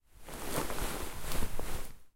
Blanket Movement 4
A down doona/duvet being rustled. Stereo Zoom h4n recording.